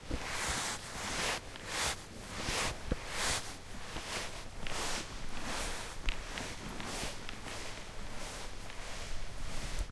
The sound is the noise of the friction of someone's bag, while the person is walking away.